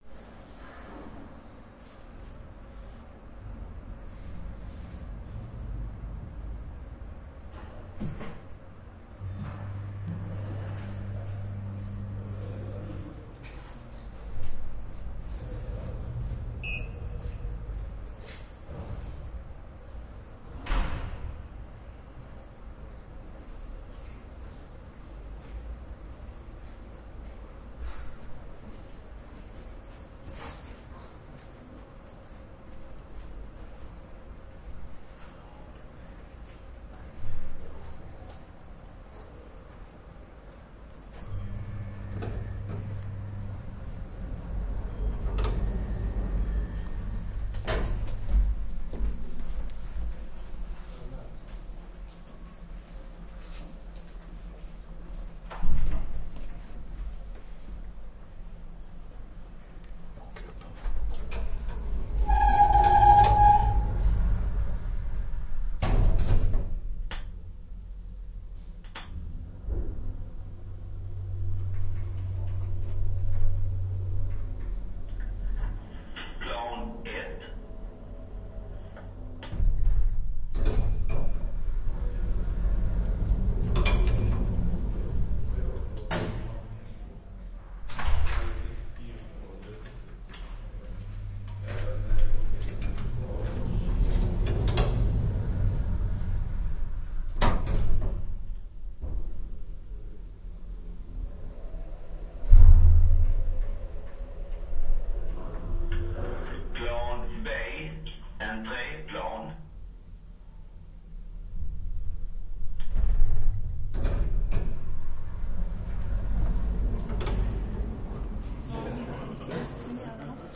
Recorded inside an ascending elevator.